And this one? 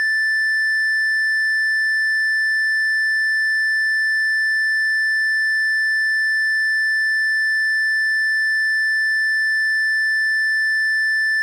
Doepfer A-110-1 VCO Sine - A6
Sample of the Doepfer A-110-1 sine output.
Captured using a RME Babyface and Cubase.